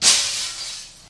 glass03-proc
Glass being dropped from a 1m height. Some noise removed, audio normalized.